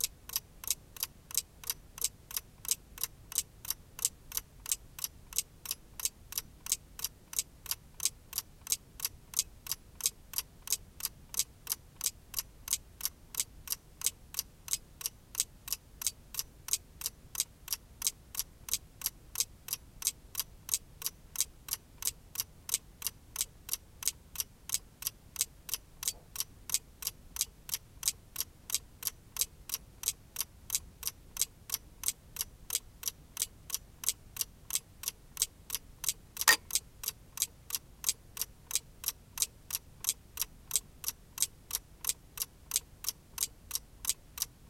wuc ticking vry close rec w bell trigger at 36s
Very close recording of the ticking mechanism of wind-up clock. Clock attempts to trigger the bells to mark the hour at about 36s. The system is broken, but with the additional bell sounds I uploaded, you can recreate it as you wish. Also possible to just edit out the trigger and loop the ticking.
click, clicking, clock, close-up, tick, ticking, wind-up, wind-up-clock